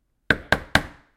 door three knocks hard
Three hard knocks on a front door.
door, knocks, front-door, hard, three